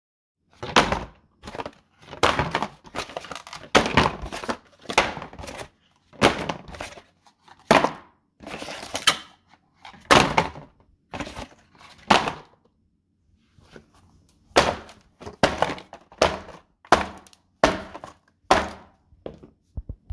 Me trying to make cardboard boxes sound like some one breaking through a door like Jack Nicholson in The Shining.